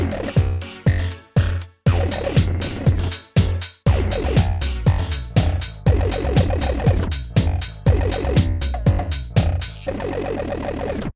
A beat that contains vocal-like formants.